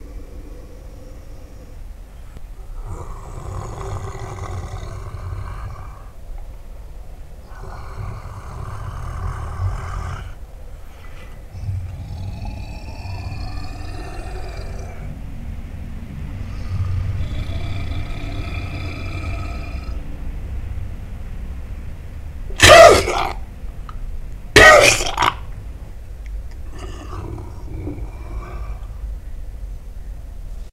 Growl + Cough
Suspense, Orchestral, Thriller
Orchestral, Thriller, Suspense